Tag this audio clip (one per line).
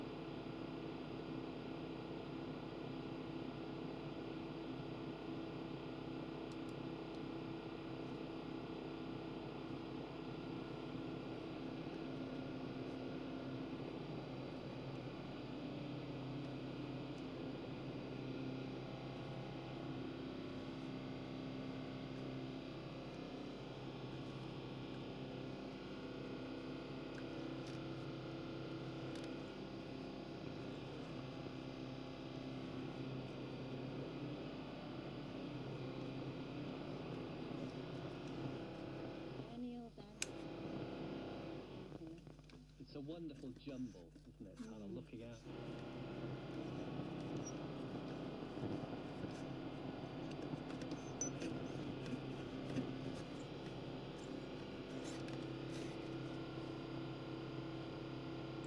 white-noise
radio
radio-static